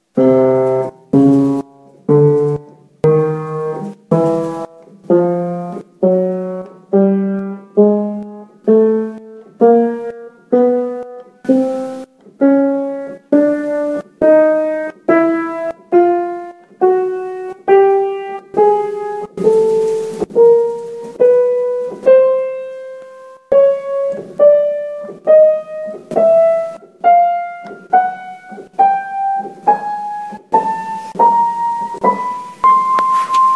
Piano sounds - C3-C6 chromatic scale, slow